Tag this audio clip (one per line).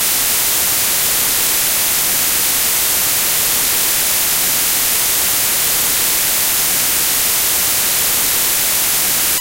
Ambient
Processed
Fx
Noise
Space
AmbientPsychedelic
Dance
Trance
Psychedelic
Psytrance
Sci-fi